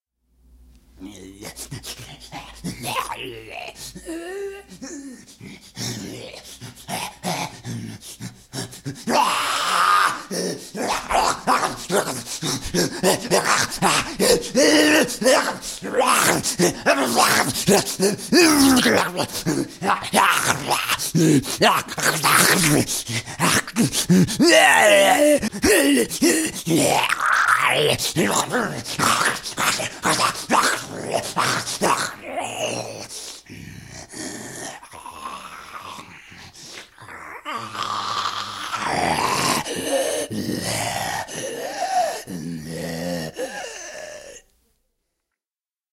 A raging infected sees humans and chases them while screaming.
Mater Morrigan Films 2018
terrifying, thrill, creepy, hunter, human-chase, nightmare, run-for-your-life, suspense, spooky, killer, terror, horror, I-Am-Leyend, 28-Days-Later, freak, World-War-Z, scary, fear, The-Last-Man-on-Earth, Left-4-Dead, monsters, persecution, infected, zombies, insane, The-Walking-Dead, sinister, Omega-Man